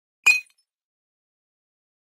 Hitting Glass 05
break, breaking, glass, shards, shatter, smash